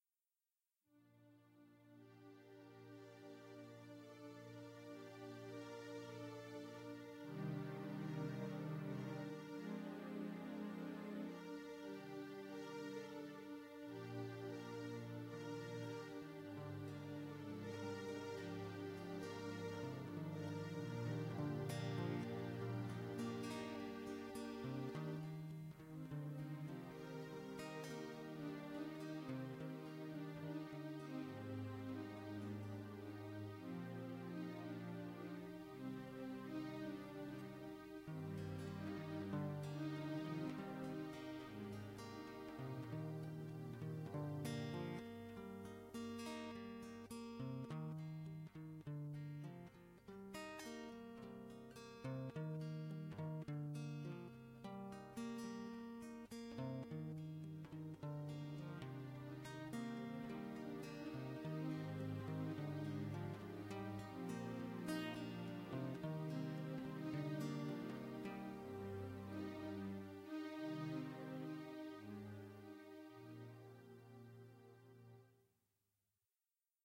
Orchestral with guitar simple melody motif. Chorus under guitar repeating melody. Interlude or transition.
Cinematic, Film, Free, Interlude, Movie, Orchestral, Passing, Romantic, Transition, Wistful